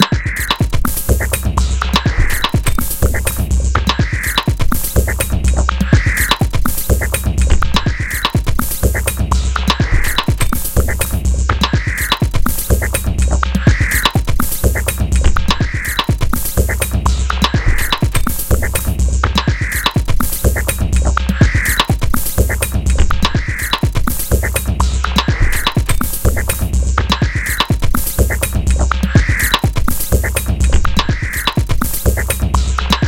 5 hours to go
A train like techno loop.created using only sounds from free sound.
industrial, loops, machines, minimal, techno